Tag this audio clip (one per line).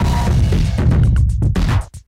Analog
Battery-Powered
Break-Beat
Explosive
Klang
Lofi
Lotek
Retro
Toy-Like